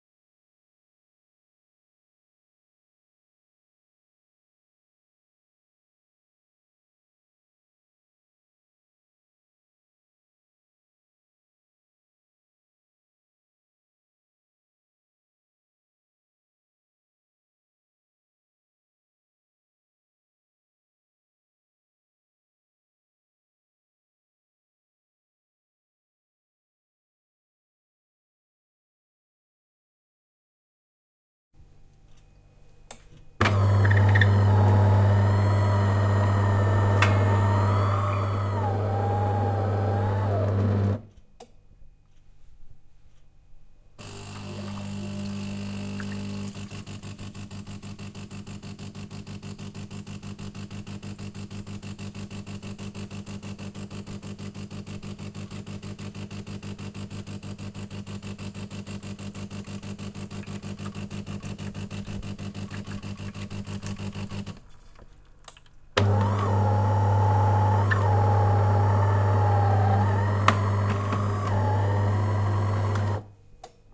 kávovar čištění
clean, cleaning, coffee, coffee-machine, machine